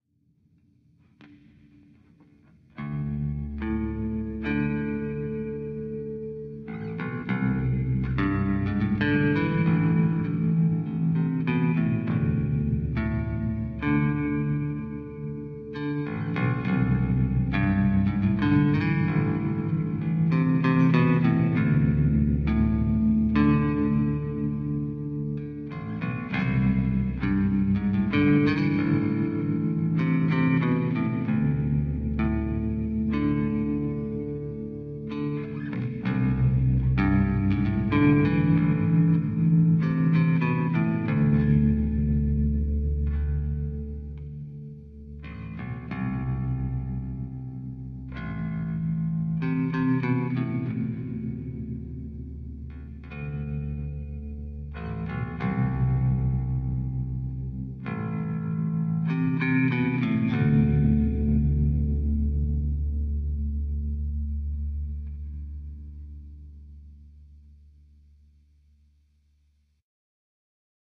Bass affected with space echo and vibrato. Sorry it's not set to a click :'(
I would prefer to be credited by my artist name, Flower Mantis.